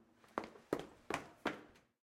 Male dress shoes fast walk louder volume
Male walking in dress shoes. Recorded with an H4n recorder in my dorm room.
male, dress, linoleum